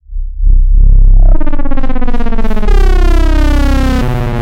you can use this as a intro for youre track , with a distorted vague sound coming up with volume